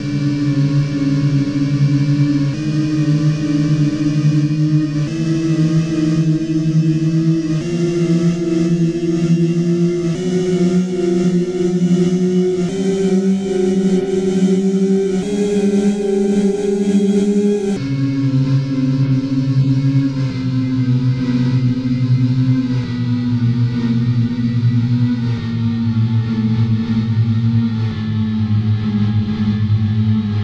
Evil granular synthesis... A scale you can make your own multisamples with! Hooray!!!
evil, grains, granular, scale, synth